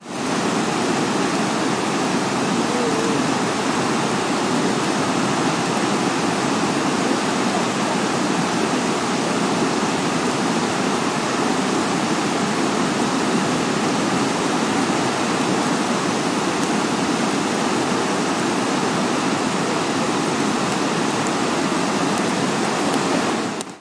20160309 08.waterfall.closeup
At close distance, waterfall noise. Some talk can also be heard. PCM-M10 recorder, with internal mics. Recorded on the Brazilian side of Iguazú waterfalls.
field-recording, stream, water, waterfall